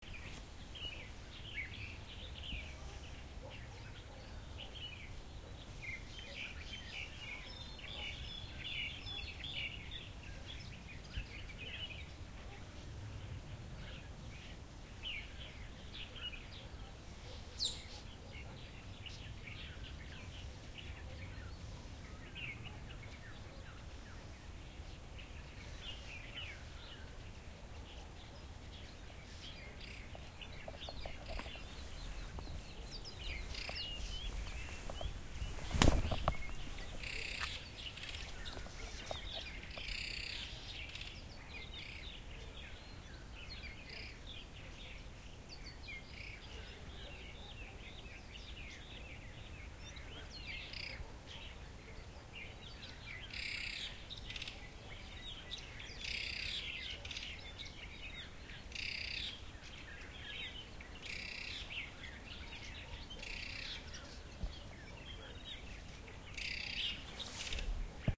Stereo recording in a farm on iPhone SE with Zoom iQ5 and HandyRec. App.